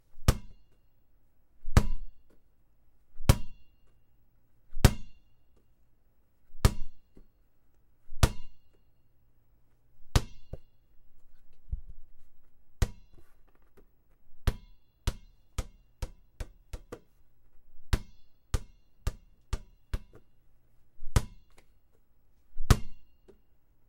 basketball ext dribble bounce hard surface
bounce, dribble